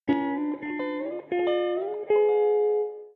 Recorded with Epiphone sheraton II pro into a Mixpre 6 via DI box, cleaned up and effects added.